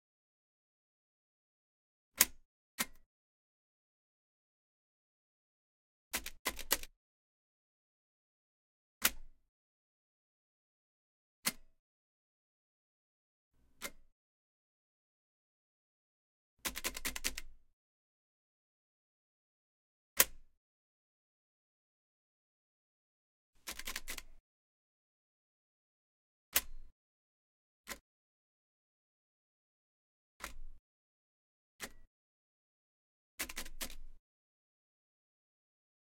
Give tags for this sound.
button; field-recording; machine; register; vintage

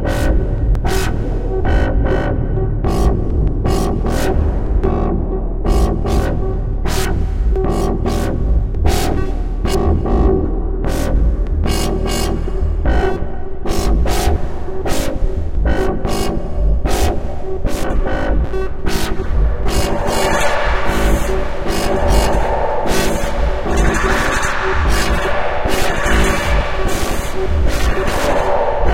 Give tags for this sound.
2; Buchla; Buchla-200-and-200e-modular-system; Cloudlab-200t-V1; Reaktor-6

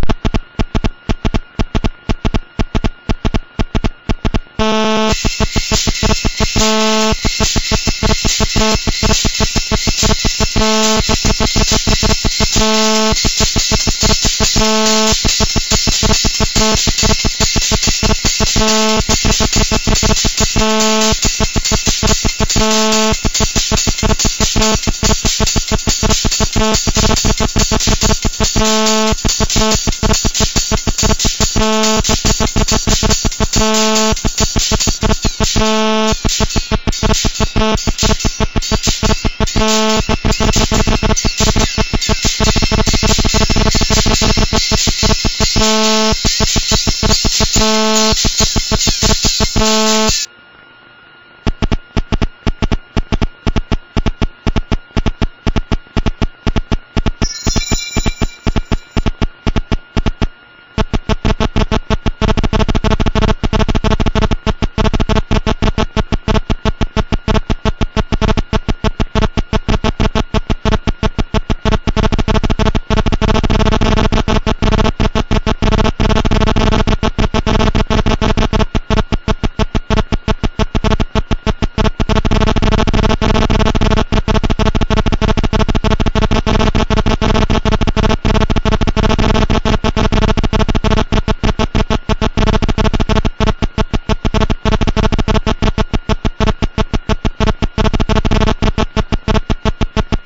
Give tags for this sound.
buzz; cell; cellular; mobile; phone

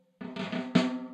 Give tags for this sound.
snare; acoustic; drum-roll

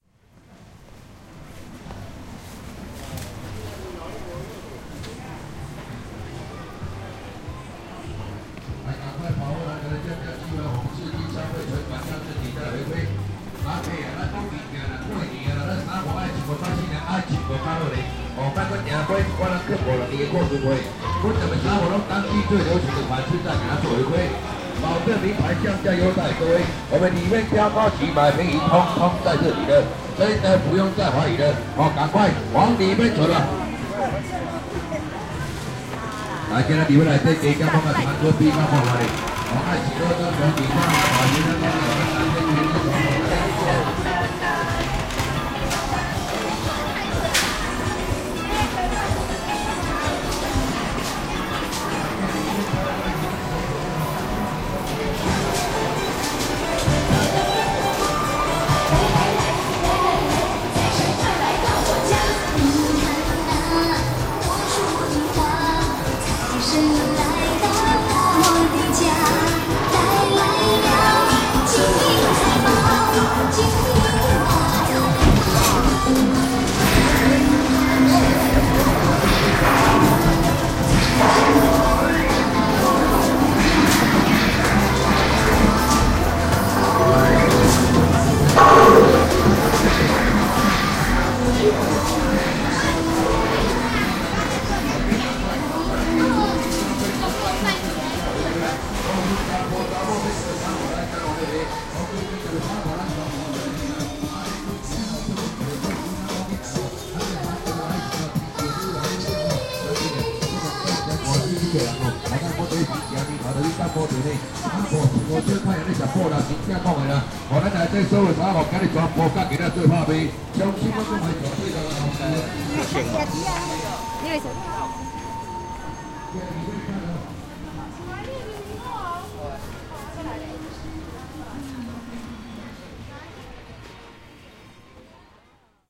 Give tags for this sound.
city; crowd; market; taipei

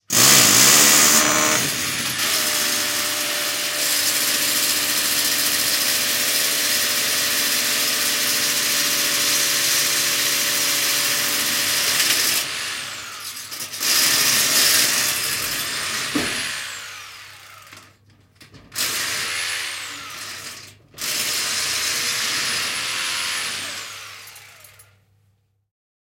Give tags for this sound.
drill; drilling; electric; industrial; machine; mechanical; motor; noise; repair; rotation; tools

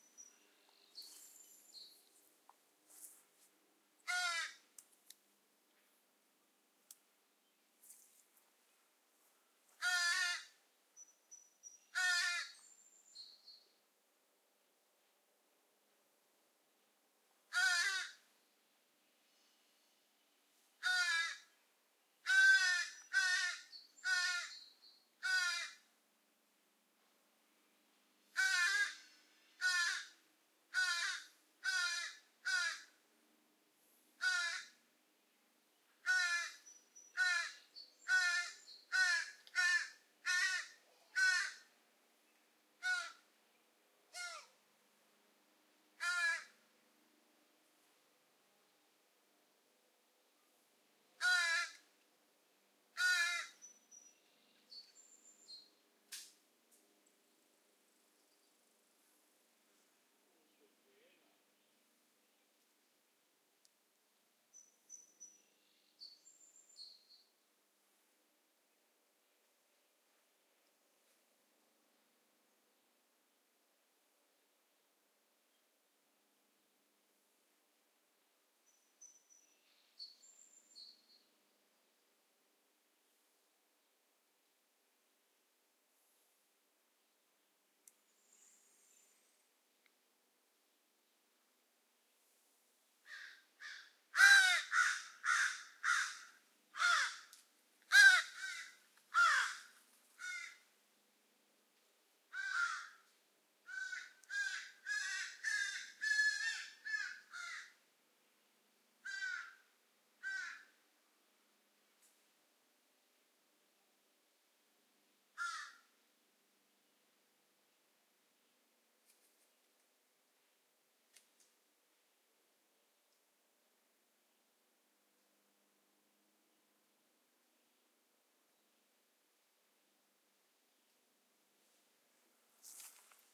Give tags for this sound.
field-recording; birds; ravens; nature

